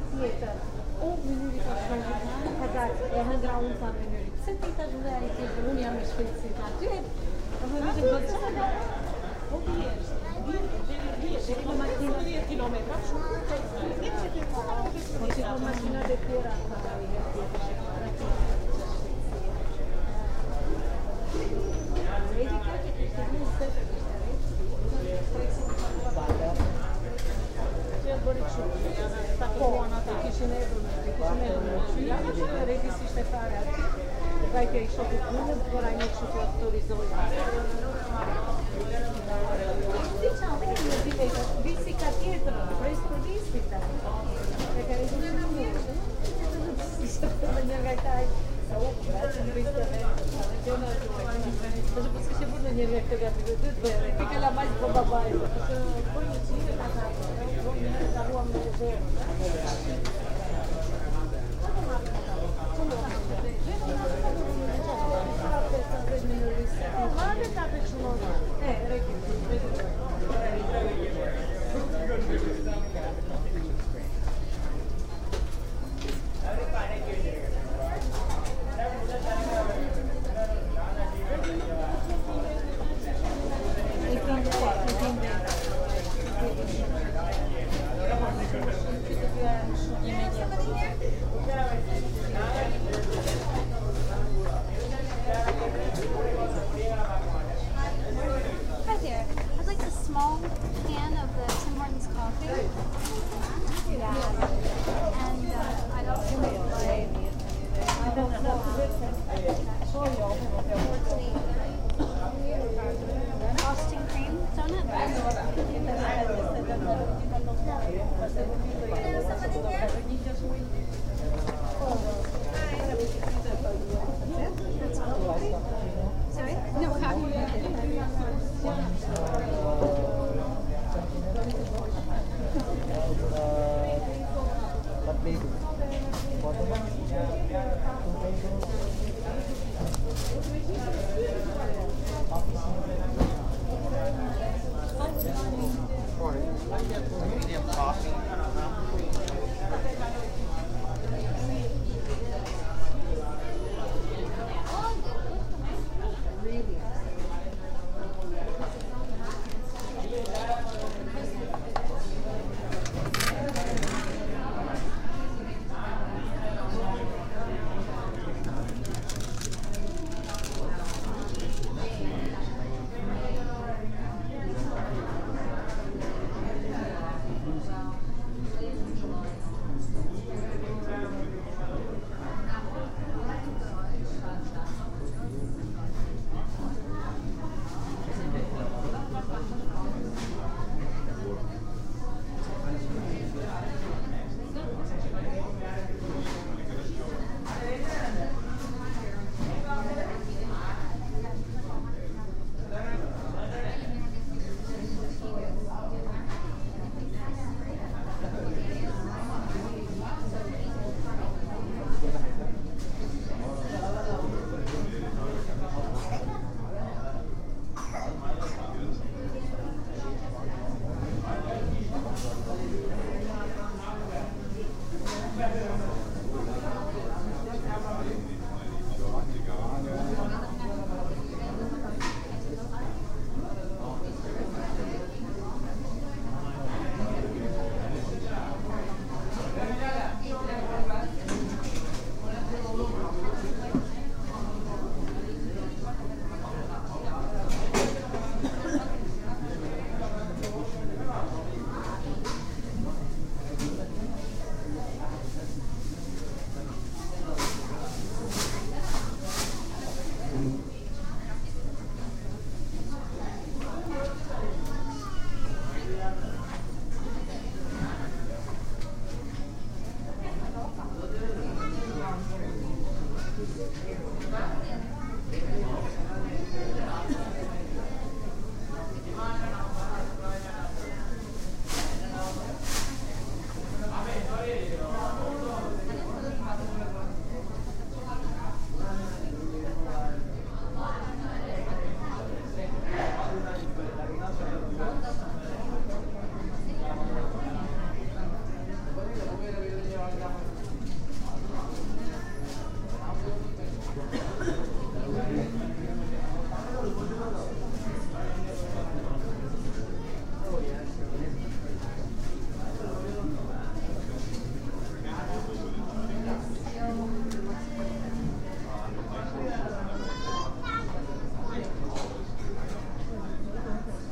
Mono recording of a Tim Horton's coffeeshop in Toronto.
crowd, ambiance, cafe, coffeeshop, murmur, field-recording, conversation